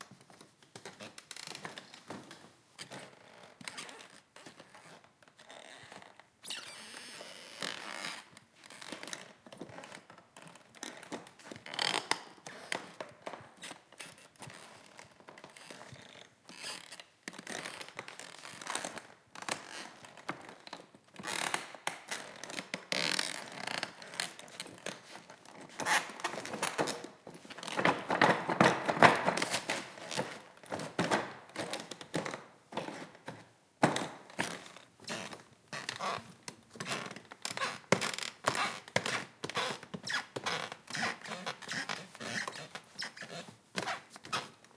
short clip of a Walk on the parquet. Recorded in a old school building, levoca, slovakia. Zoom H1 internal mic
feet, floor, foot, footstep, footsteps, ground, parquet, step, steps, walk, walking